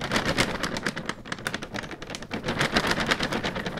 flag flap 1
Flag flapping in the wind
flag, flapping, wind